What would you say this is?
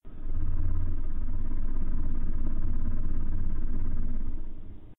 Monster Exhale
monster, creature, fantasy, exhale